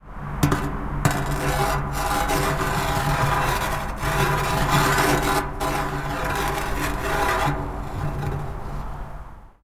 gnashing metal
field notes, sounds of metal crashes
ambient, beating, experimentation, industrial, metal